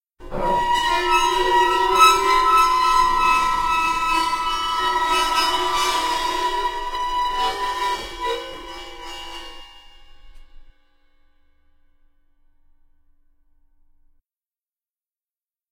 Metal Chaos Dry
chaos, dry, metal